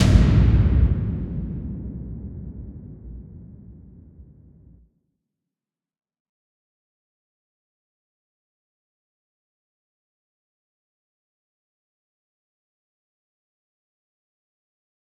Cinematic Dramatic Stinger Drum Hit Drama
Stinger for adding drama to your scene
Cinematic, Dramatic, Drum-Hit, Stinger